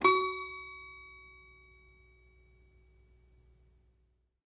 This lovely little toy piano has been a member of my parent’s home since before I can remember. These days it falls under the jurisdiction of my 4-year old niece, who was ever so kind as to allow me to record it!
It has a fabulous tinkling and out-of-tune carnival sort of sound, and I wanted to capture that before the piano was destroyed altogether.
Enjoy!
Carnival, Circus, packs, Piano, sounds, Toy, toy-piano